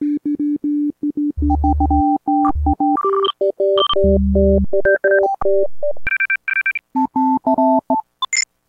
An Electribe EA1 playing some notes through a Nord Modular and other effects. Sort of a weird Morse code.
noise, synth, nord, digital, electribe, sound-design, modular, beep, glitch